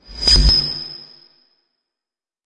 A high pitched and high speed "zing" sort of sound, perhaps for something moving very fast. This one also has a low frequency element.